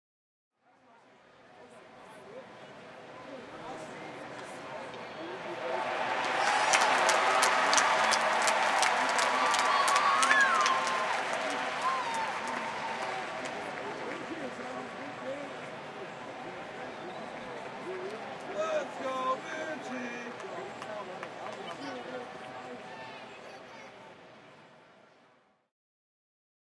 WALLA Ballpark Applause Short 05
This was recorded at the Rangers Ballpark in Arlington on the ZOOM H2.